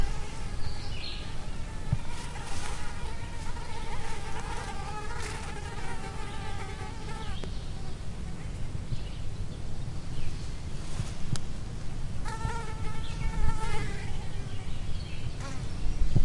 Flies Fighting
A group of flies near the ground buzzing and bumping into each other. A fly fight?
insects, flies